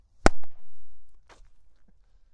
Glove Catch 4 FF011
1 quick, low-pitch glove catch, hard smack.